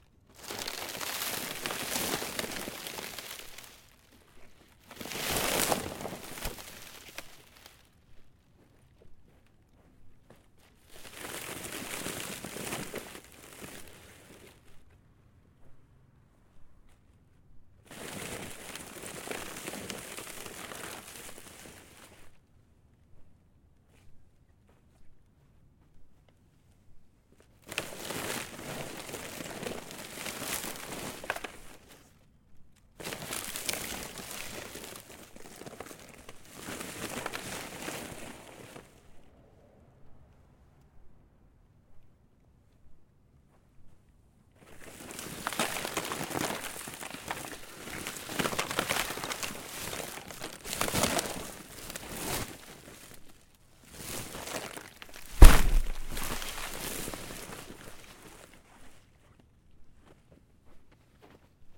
Frozen Tarp Moves 2 BM-01.L
Frozen tarp - I left a large wet tarp outside one night during the winter and it froze, this is the sound of it being crumpled up. Nice ice creaks. Originally was to be used for the movement sounds of an Ice Dragon! Recoded with a Zoom H4
Creak, Frozen, Ice